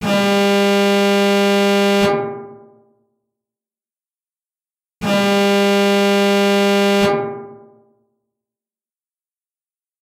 PRODUCTION
Audacity prod
Generate a 200Hz sawtooth sound, amplitude 0.8, duration 2s
Generate a silence, duration 3s
Fuse
Apply reverb, room size 16%, pre-delay 5ms, reverberance 78%, damping 69%, tone low 100%, tone high 100%, wet gain 5dB, wet only
Repeat sound once
Reproduction du signal décrit dans l'Arrêté du 23 mars 2007 relatif aux caractéristiques techniques du signal national d'alerte, article 3 concernant les caractéristiques techniques des signaux spécifiques d'alerte et de fin d'alerte des aménagements hydrauliques.
TYPOLOGIE
Itération variée
MORPHOLOGIE
Masse : Groupe de sons cannelés
Timbre harmonique : Acide
Grain : Lisse
Allure : Stable
Dynamique : Attaque abrupte
Profil mélodique : Aucune variation
Site : Scalaire
Calibre : Aucun